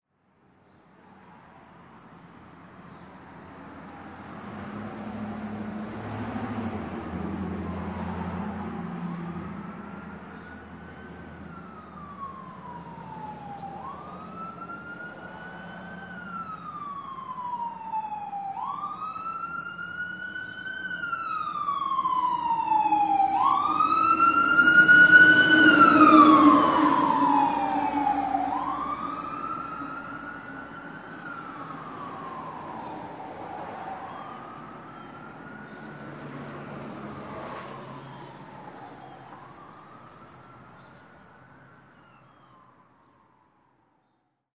Fire Engine with Siren Passes
A siren can be heard in the distance and eventually, a big fire engine passes, siren blaring and engine roaring.
Hear all of my packs here.